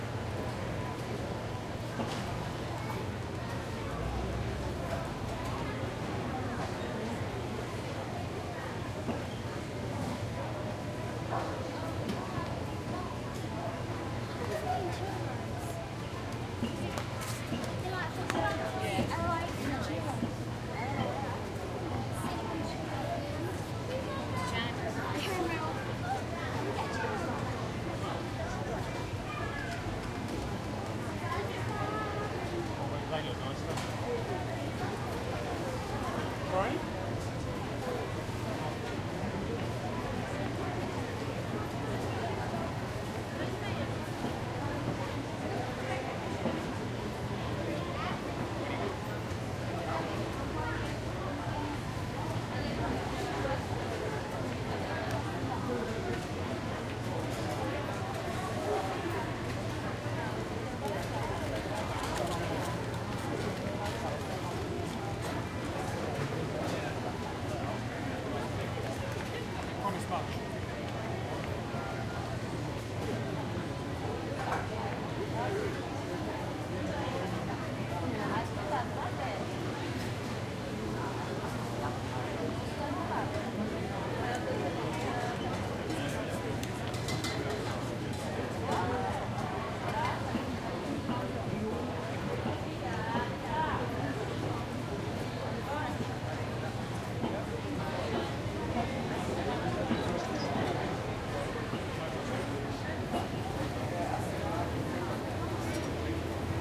4ch surround recording of a food market situated in a closed arcade area in Greenwich/England. A hubbub of voices can be heard, many people walking by, talking and purchasing or trying diverse food on offer.
Recording was conducted with a Zoom H2.
These are the FRONT channels of a 4ch surround recording, mics set to 90° dispersion.